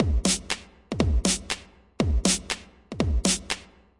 120 bpm kick snare thumper double claps offbeat reverb
dance, techno, hop, house, trance, drumloop, drum, snare, electro, beat, electronic, edm, 120bpm, kick, loop, hip, drums